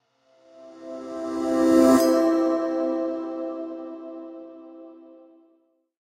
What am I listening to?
Corporate Rise-and-Hit 01
Corporate Rise-and-Hit logo sound.
corporate, logo, rise-and-hit